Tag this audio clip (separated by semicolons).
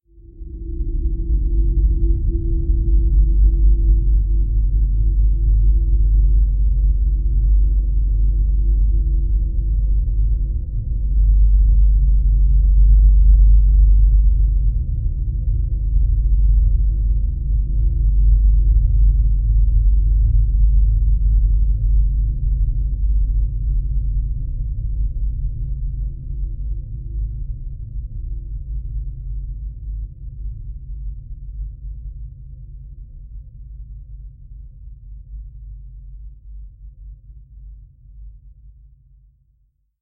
cinimatic; pad; cloudy; space; multisample; soundscape